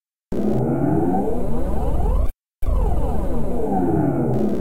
a stab that rises and then falls; made in Adobe Audition
processed, loop, hook, stab, electronic